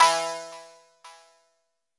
Delayed melodic mallet highpassed 115 bpm E2
This sample is part of the "K5005 multisample 03 Delayed melodic mallet highpassed 115 bpm"
sample pack. It is a multisample to import into your favorite sampler.
It is a short electronic sound with some delay on it at 115 bpm.
The sound is a little overdriven and consists mainly of higher
frequencies. In the sample pack there are 16 samples evenly spread
across 5 octaves (C1 till C6). The note in the sample name (C, E or G#)
does indicate the pitch of the sound. The sound was created with the
K5005 ensemble from the user library of Reaktor. After that normalizing and fades were applied within Cubase SX.
delayed, electronic, mallet, multisample, reaktor